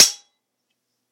Sword Clash (6)
This sound was recorded with an iPod touch (5th gen)
The sound you hear is actually just a couple of large kitchen spatulas clashing together
metallic, impact, clashing, hit, iPod, stainless, ting, clanging, sword, slash, ringing, ping, clank, ding, metal, strike, metal-on-metal, slashing, clash, swords, clang, ring, knife, struck, steel